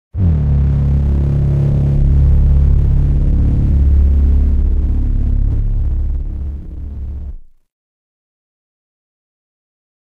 Cherno Alpha Distortion
Alpha, blume, Cherno, Distortion, electronic, felix, horn, Jaeger, mechanical, pacific-rim